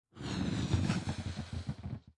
Baloon for intro
Blowing into a baloon
baloon, blowballoon